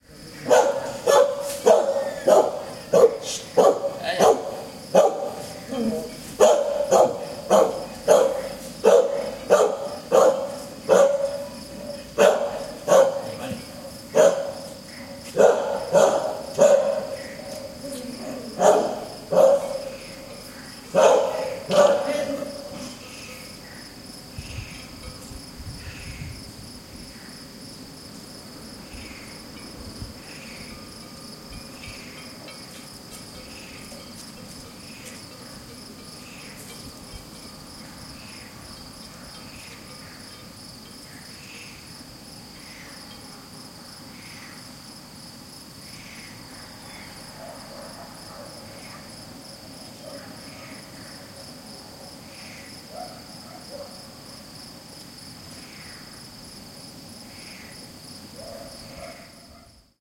20190625.barkings.hamlet.night.093
Late at night, an old dog barks and a man hushes. Background with crickets, frogs, distant dog barkings and cowbells. Audiotechnica BP4025 into Sound Devices Mixpre-3 with limiters (inadvertently) on, which for once came handy.